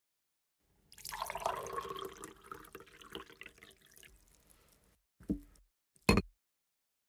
pouring coffee
Recorder: Mixpre 6
Microphones: Oktavia
coffee, drink, liquid, mug, pour, pouring, splash, water